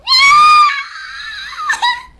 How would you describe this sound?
weird whine
whining, probably after losing a game
girl, whimper, whine